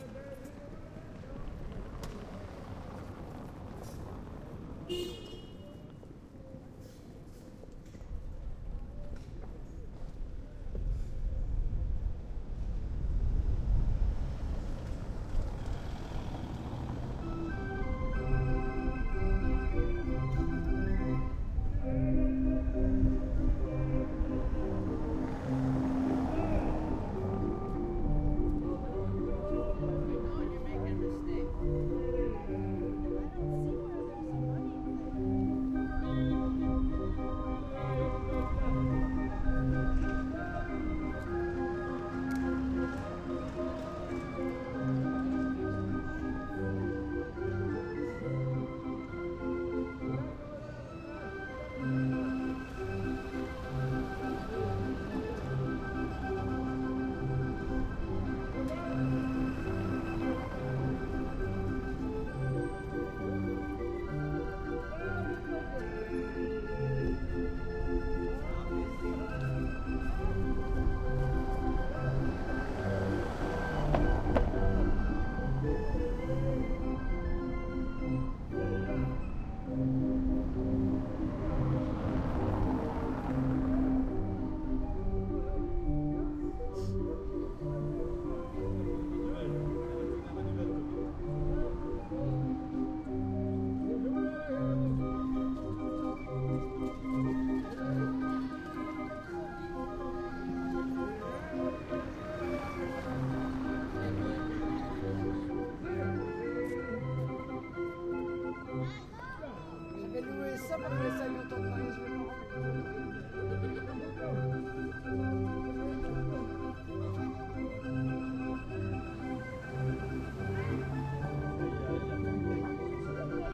In Bruges, a street performer with a hand-wound barrel organ
street-singer, street, barrel-organ, organ, bruges